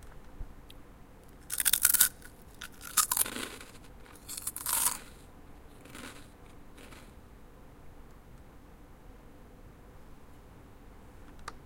Recorded indoors with a Zoom H4n Pro Handy Recorder 6 inches away from my mouth. Crunching sounds from 1 Calbee Shrimp Chip.